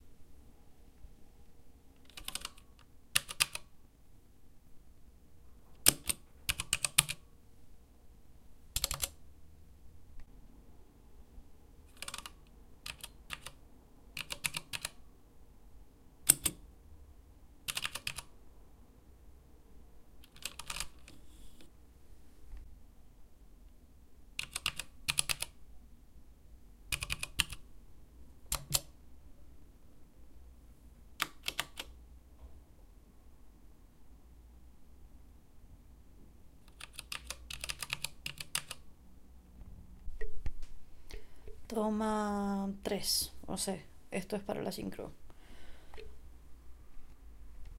Mechanical keyboard clicking. Different keys (1)
Keycap Clicks 1 cherry mx clear switch
Mechanical keyboard clicking. Different keys
Cherry mx clear mechanical switches
The Cherry MX Clear switch is a medium stiff, tactile, non-clicky mechanical keyboard switch in the Cherry MX family.
The slider is not actually clear but colourless (in effect, translucent white). The word Clear is Cherry's own designation to distinguish it from the older Cherry MX White which is a clicky switch. Older types of the "white" also have translucent white sliders and are therefore visually indistinguishable from clears.
The Cherry MX Tactile Grey switch is used for space bars in keyboards with Cherry MX Clear switches. It has a similarly-shaped stem but a stiffer spring.
MX Clear is reported to have first appeared in 1989; however, it was included in a March 1988 numbering system datasheet for MX switches so it is assumed to have been in production in 1988 or earlier.
cherry, clear, clicking, clicks, key, keyboard, keycaps, mechanical, mx, switches